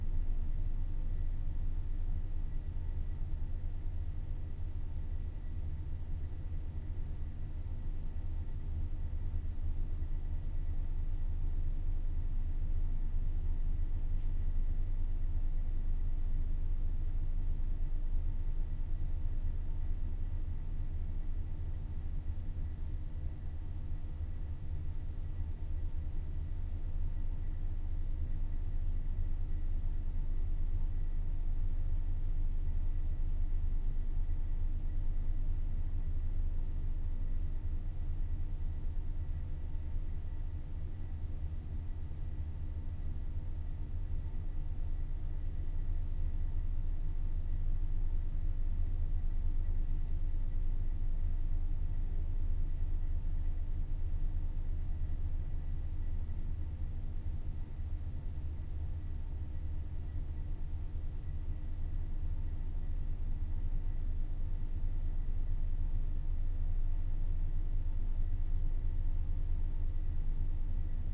RoomTone Small WC Bathroom Ventilation
WC, Roomtone, Ventilation, Bathroom